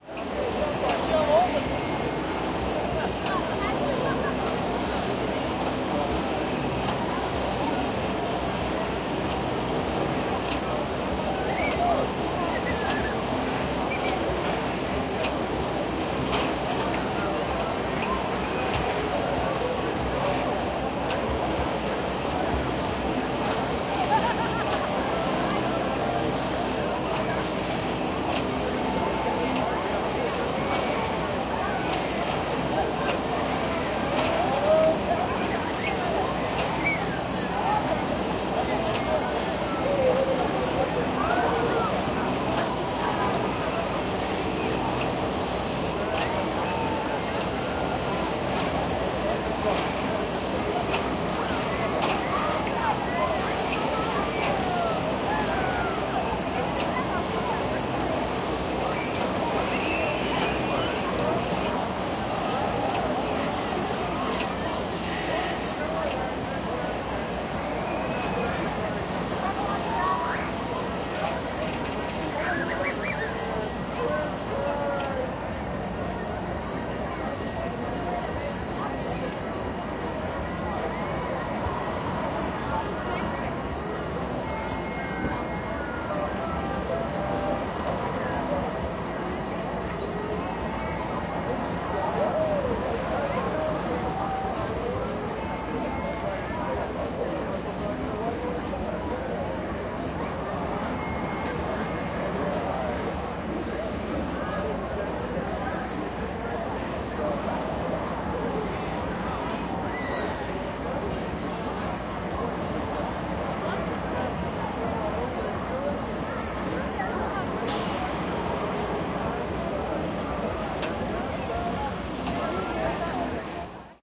Recording of the Renfrew County fair in small town Ontario. Recorded using the H2N zoom recorder.